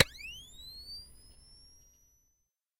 camera flash, charging